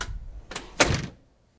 Closing a door
chaotic, crash